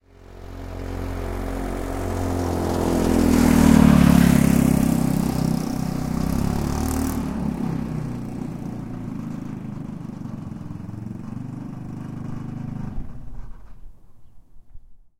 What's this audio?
Go-cart in Sugar City CO
Go-cart driving down Main Street in Sugar City, CO. Good sound of small engine going by.